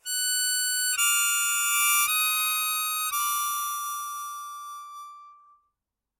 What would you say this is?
Chromatic Harmonica 23
A chromatic harmonica recorded in mono with my AKG C214 on my stairs.
harmonica, chromatic